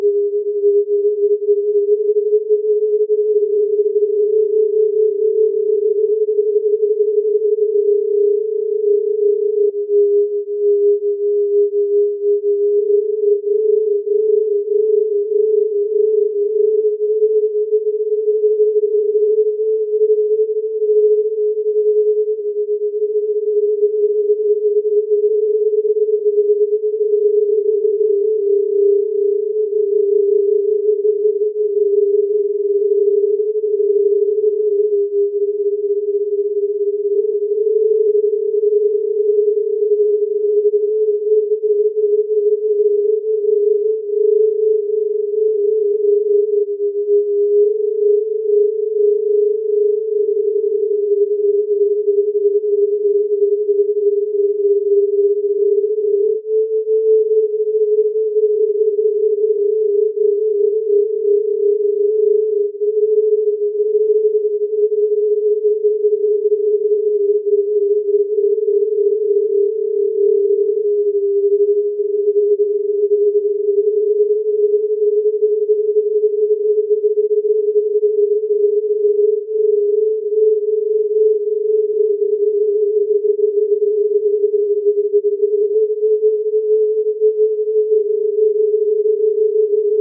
random binauralizer
testing new generator. fluctuating binaural beat via switcher.